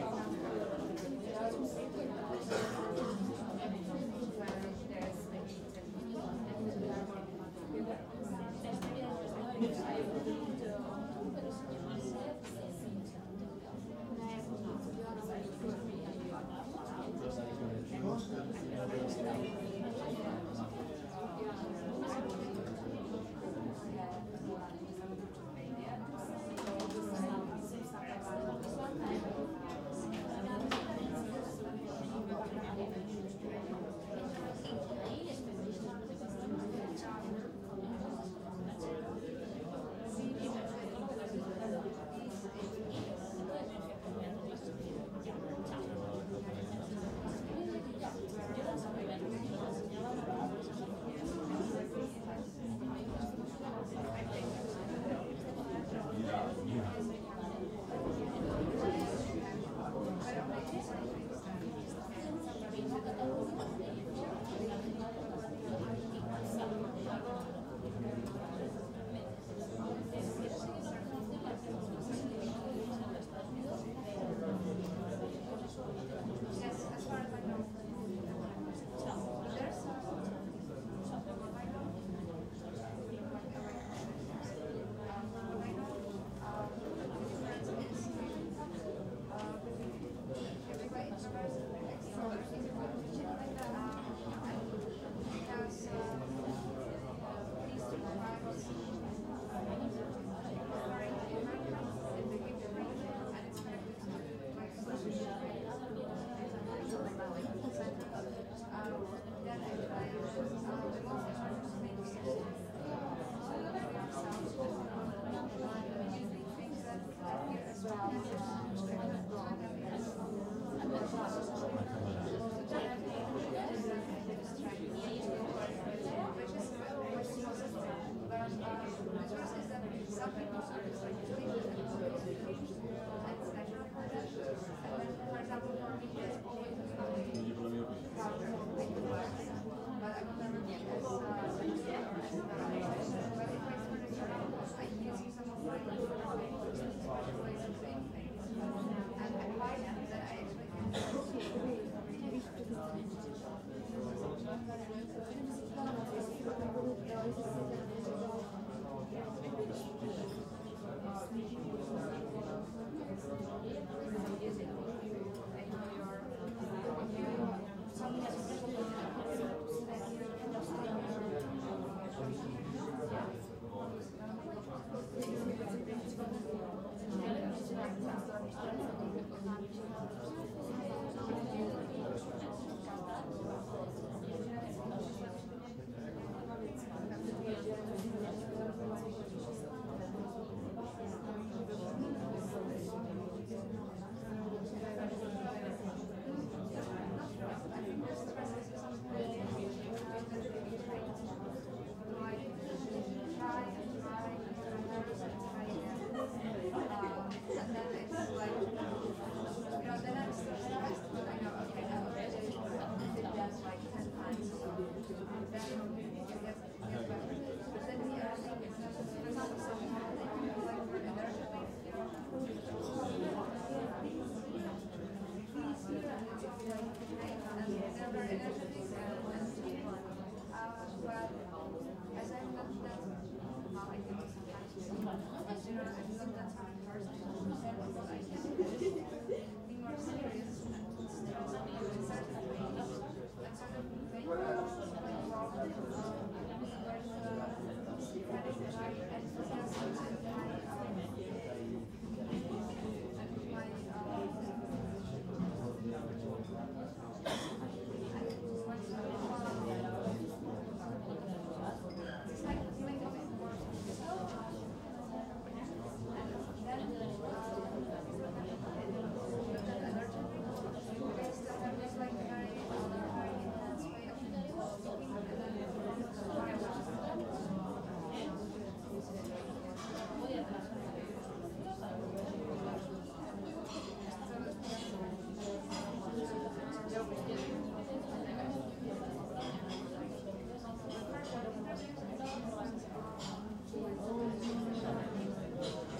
coffee shop ambience
Ambience of czech cafeteria (Paul)
Sound devices 633, Sennheiser K6 ME 66 mike, mono
breakfast, cafe, cafeteria, chat, chatting, coffee, espresso, people, restaurant, shop